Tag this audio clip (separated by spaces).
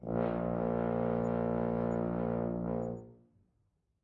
brass midi-note-34 single-note vsco-2 sustain midi-velocity-105 asharp1 multisample tuba